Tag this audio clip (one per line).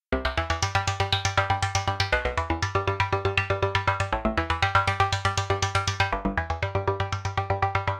120bpm
accompany
arpeggiator
bass
bassline
catchy
EDM
electronic
fun
guitar
happy
harmony
melody
music
optimistic
original
pluck
plucked
pop
synth
synthesized
synthwave
trance
upbeat